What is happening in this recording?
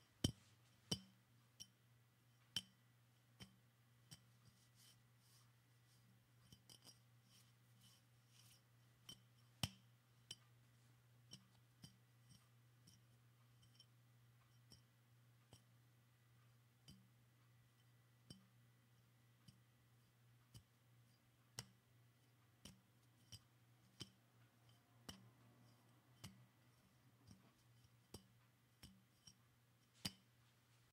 Coke Bottle, Handling, Grabbing
Handling sounds of a coke bottle